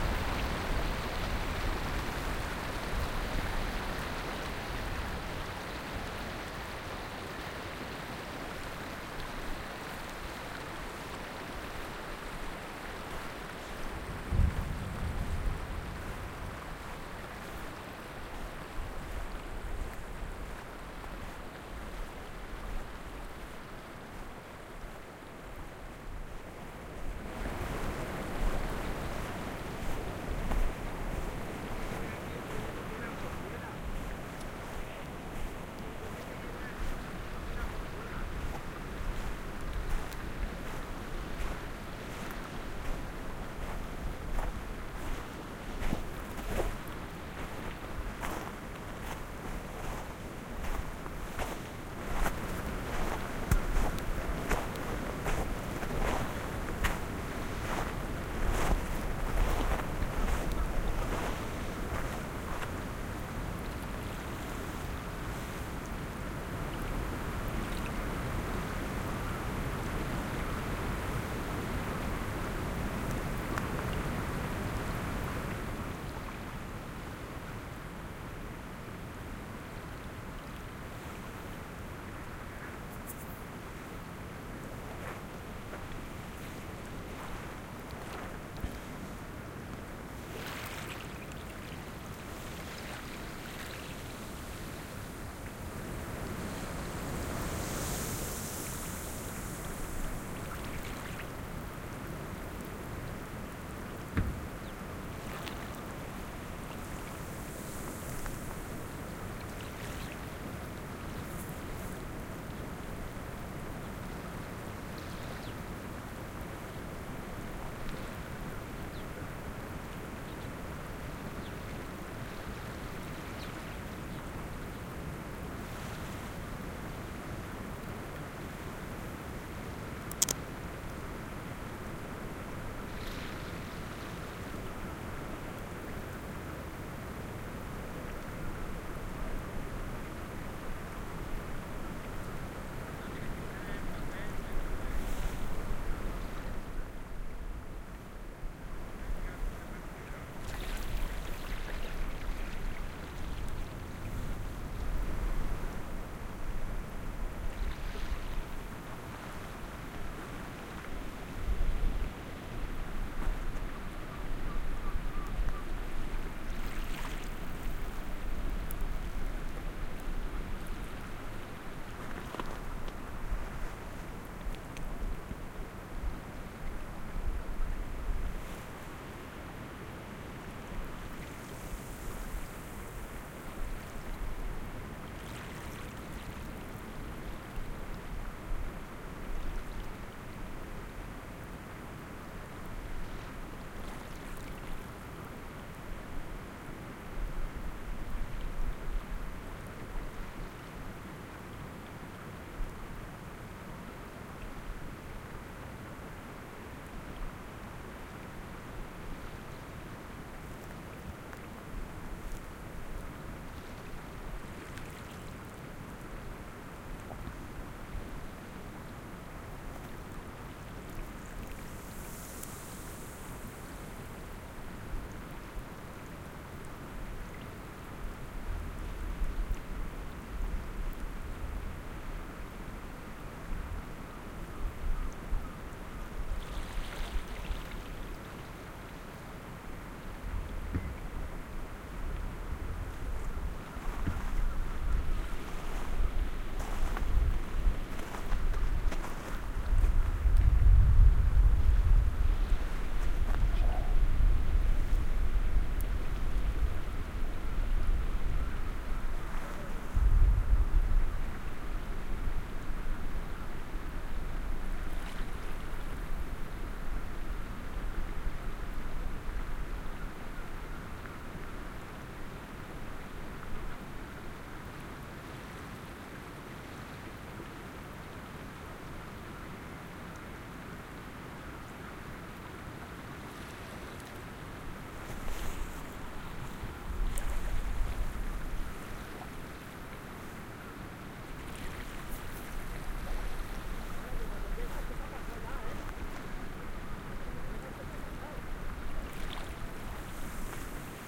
20070820.fjord.beach.01
sounds at a fjord's beach at Qaleragdlit. You can hear wawes splashing, a nearby stream, and the rumble of ice falling from a distant glacier front (like thunders). Recorded with a pair of Soundman OKM mics plugged into a Fel BMA1 preamp. Recorder was an iRiver H320.
beach, field-recording, waves, environmental-sounds-research, water, fjord